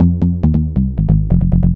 A funky little bass loop in the key of F. It is 138 BPM.
F F D#D# C B#B# FFFF
I synthesized the noise from a saw and a pulse wave on my A3k.
138bpm, bass, f, loop, synth